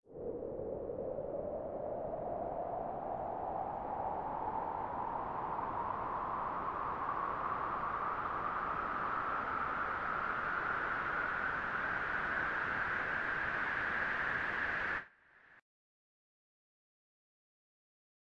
Sonido de aire